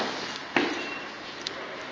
Walking on stairs
Walking loudly on stairs at an above ground underground station
feet, floor, footsteps, loud, person, stairs, steps, walk, walking